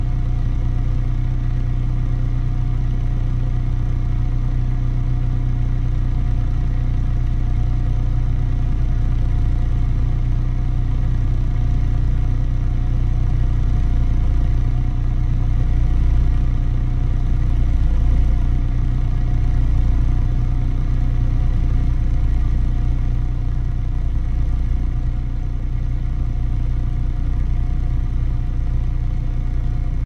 Close up of car exhaust while idling
idling, exhaust